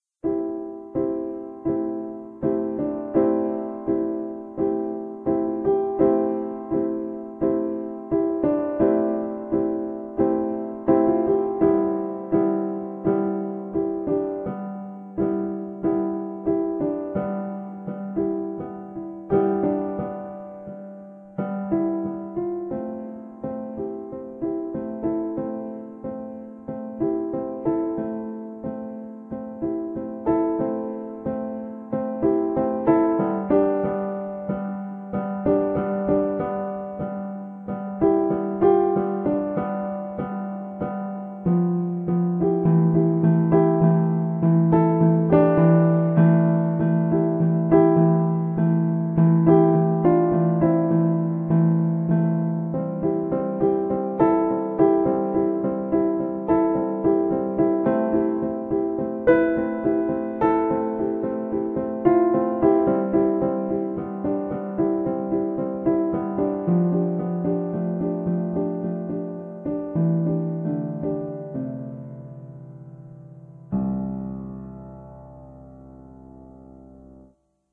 Ambient Piano Music #1
Ambient soft piano music.
Made using
• M-Audio Oxygen 61
• FL Studio
• Independence VST
I'm fine if you use this in a for-profit project, as long as you credit.
grand, keyboard, music, piano, soft, multisample, synth, ambient